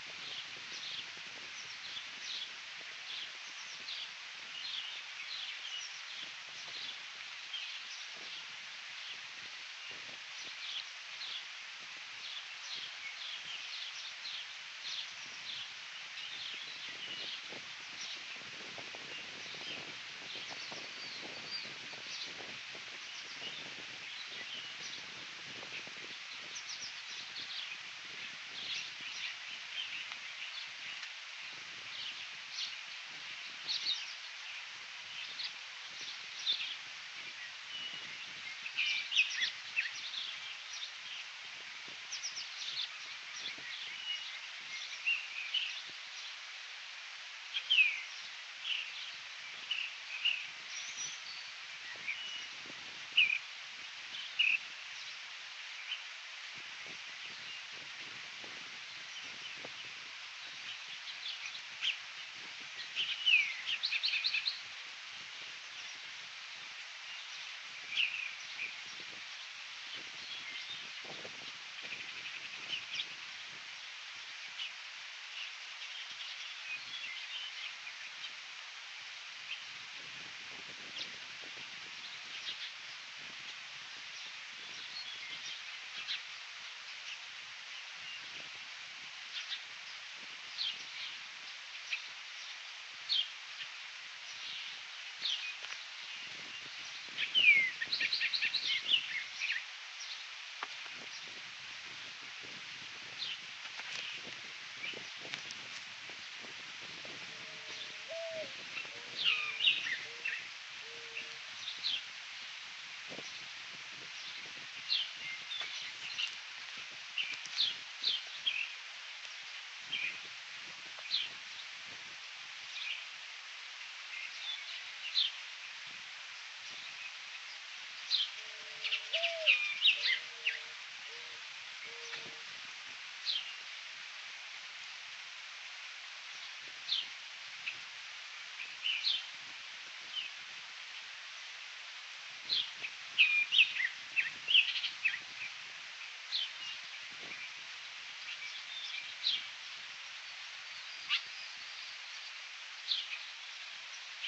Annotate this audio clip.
Woods at Condon Peak
Birds at Condon Peak, before a solar eclipse. Recorded on an iPhone 3GS.
woods, birds, nature, forest, field-recording, exterior, outdoors